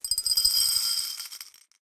Bag of marbles poured into a small Pyrex bowl. Glassy, granular sound. Close miked with Rode NT-5s in X-Y configuration. Trimmed, DC removed, and normalized to -6 dB.